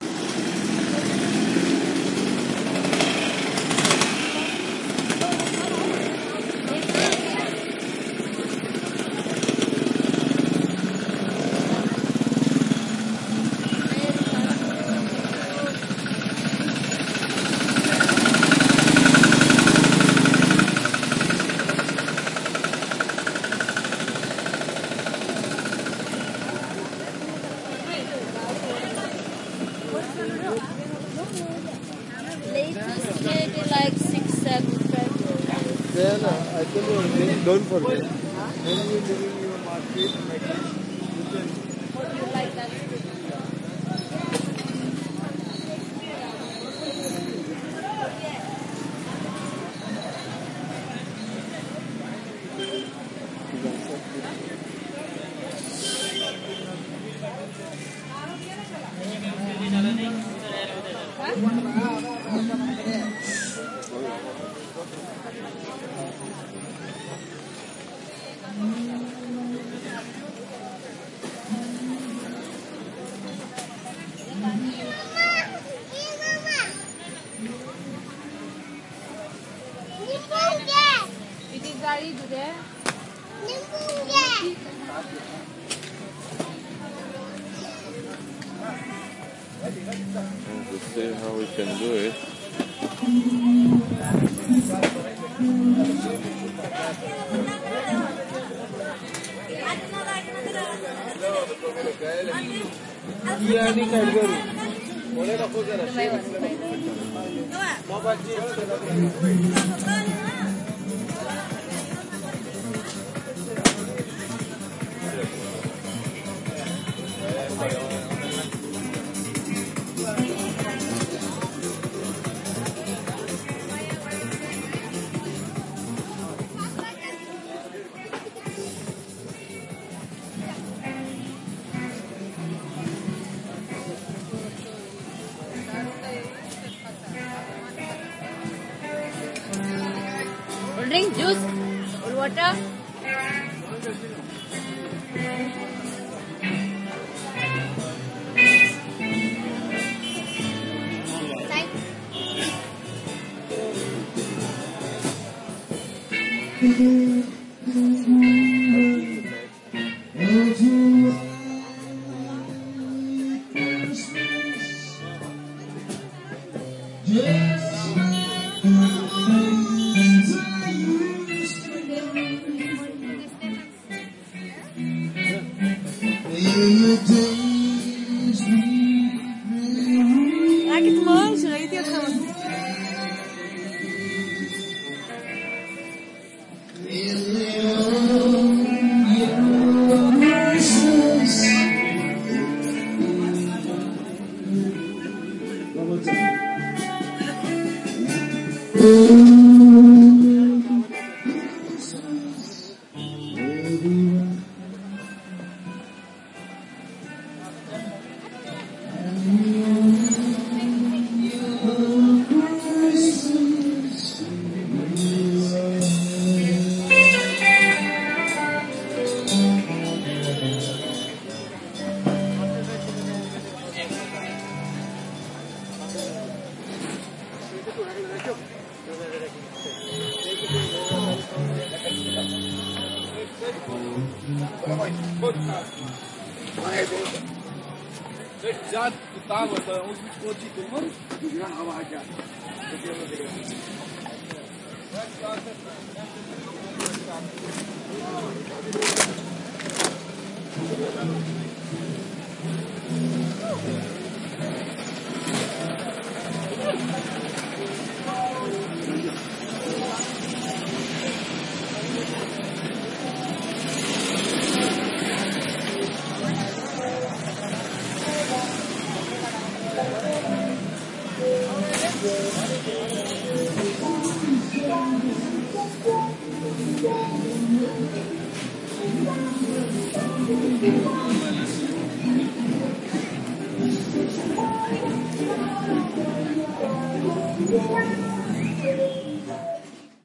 anjuna market b
field recording from Anjuna market part 2
field-recording, india, project-samples, anjuna, goa, felt-collective